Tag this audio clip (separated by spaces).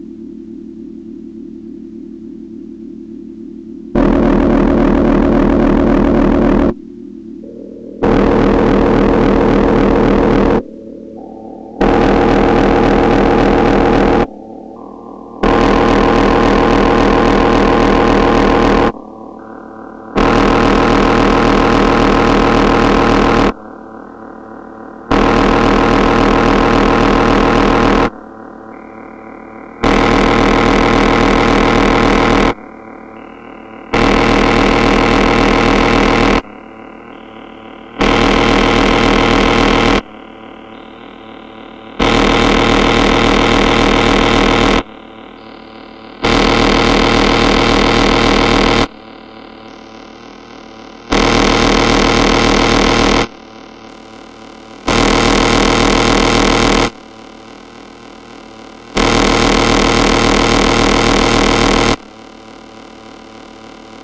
Synthesizer Kulturfabrik